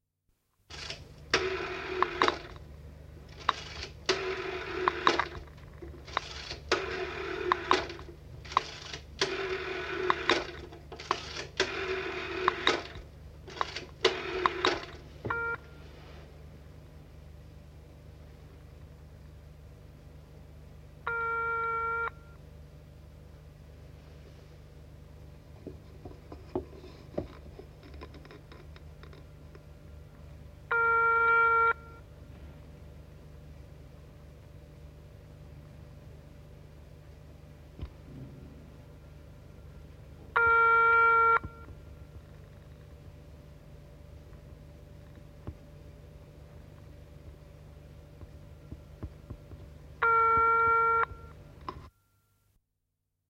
1960s Landline Telephone: Dialing & alarm signal // Puhelimen numeron valinta ja hälytysääni
Valitaan numero valintalevystä pyörittämällä. Linjalta kuuluu hälytysääni.
Aika/Date: 1969
1960-luku, Field-Recording, Finnish-Broadcasting-Company, Lankapuhelin, Luuri, Puhelin, SSuomi, Telephone, Yle